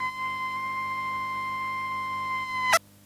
beep 007 Long
From the Mute-Synth-2.
What's up with this one? DOes the Mute Synth have a sense of humour? Silly long beep ending with a sound almost like a cartoon horn.
crazy, cartoon, electronic, Mute-Synth-II, Mute-Synth-2, funny, fun, lo-fi, beep